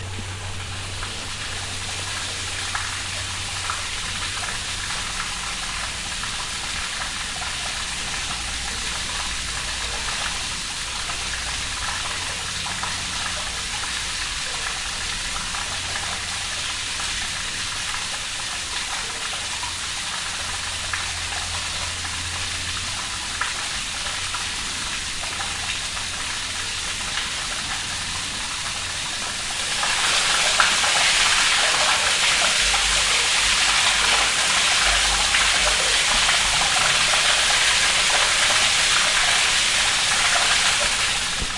Shaw-Manchester sewer sounds Toronto 21 Apr 2012
Recorded at a storm sewer grating at Shaw St & Manchester Ave in Toronto's Seaton Village neighbourhood, on 24 Apr 2012. The surface drainage in this section of the city was once carried by the lost Garrison Creek, and so this might be the sound of the lost creek's waters.
H4N sound recorder with Sennheiser MKE400 stereo microphone.
Canada, street-sounds, field-recording, Garrison-Creek, Toronto, sewer-sounds, urban-water